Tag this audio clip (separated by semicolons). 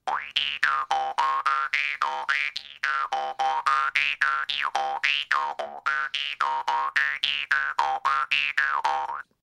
boing; bounce; doing; funny; harp; jaw; silly; twang